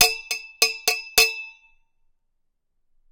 Multiple hits of a object against a metal drink bottle
bottle, ding, dong, metal, tink